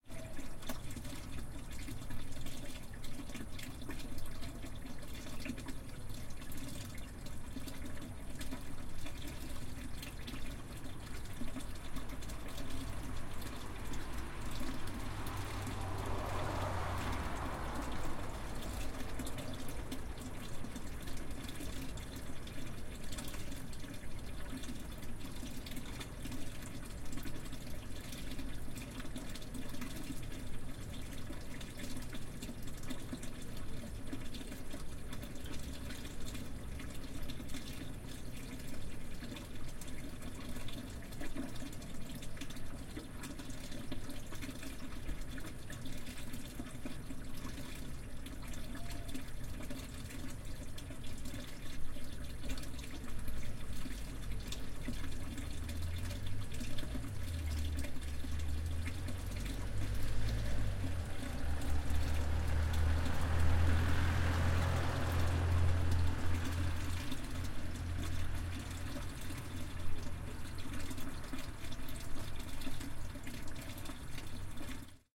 tata hungary smelly well 2 20080718
Smelly well babbles not far away, cars going by the nearby road. Recorded using Rode NT4 -> custom-built Green preamp -> M-Audio MicroTrack. Unprocessed.